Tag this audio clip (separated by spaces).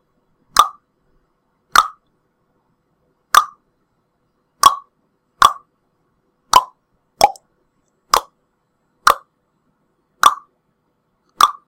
click button